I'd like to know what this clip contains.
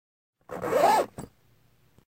The sound of a zipper being zipped quickly
fast, zipping